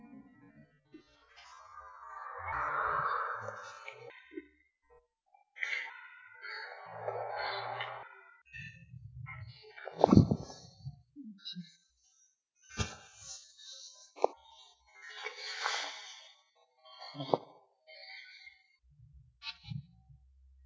Creepy Ambience
When I was removing the noise from a recording of a cat meowing through Adobe Audition I got this strange effect on the non-meow parts. I've extracted this, minus meows. See what you can do with this, makes me think of something out of Tate Modern or the Science Museum.
ambience, creepy, experimental, random